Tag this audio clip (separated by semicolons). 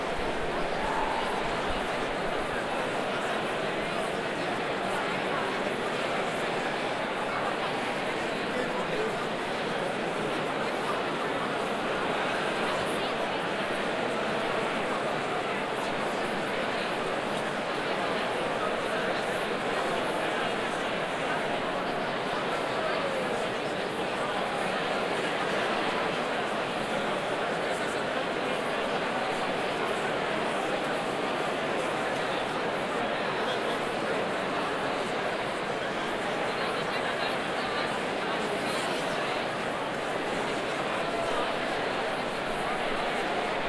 auditorium; Crowd; field-recording; indoor; indoors; inside; people; stereo; talking; theatre; voices